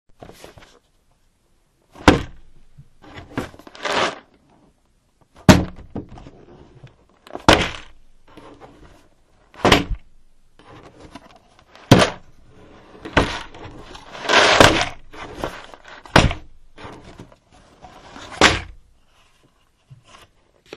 Jigsaw puzzle box full of pieces being dropped onto a table